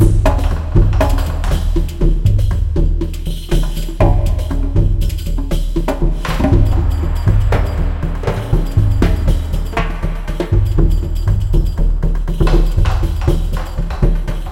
Loop Jungle War Drums 03
A music loop to be used in fast paced games with tons of action for creating an adrenaline rush and somewhat adaptive musical experience.
gamedev, videogame, war, battle, videogames, music